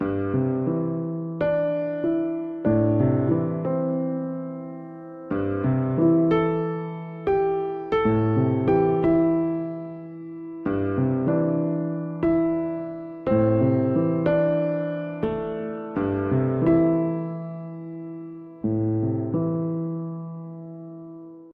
Piano pop (90bpm)
A little theme in the chords Eminor and C.
90 bpm.
Recorded with Ableton live.
Place: Iceland, Njardvik.